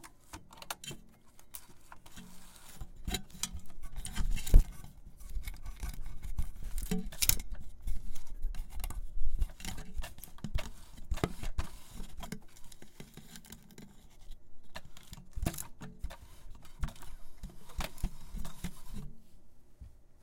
reel to reel tape machine tape and spool handling threading3
machine, spool